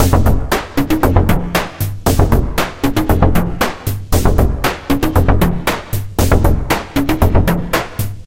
DS 10 a very unique beatbox, it's a vst and it's free !!